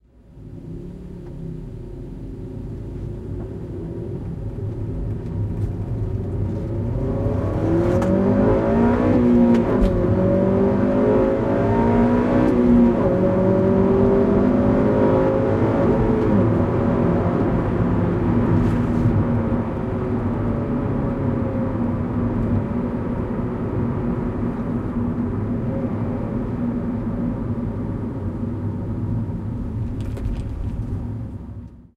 CivicSI.Accelerating.1.B
A casual take (with Camcorder JVC-520)…Trying to get out of traffic...but 44.1hs